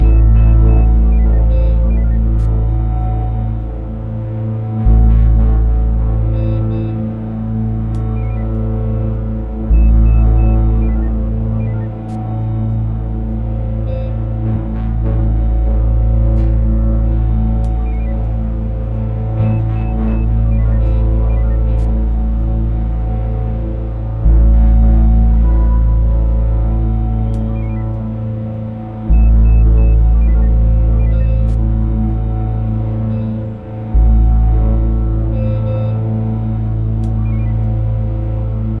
Dark Server
I was playing about in Absynth and Massive and with some samples this evening. Came up with this nice atmosphere. It made me think of a dark / menacing computer room somewhere in the future.
ambient, atmosphere, computer, dark, fiction, menacing, science, server, soundscape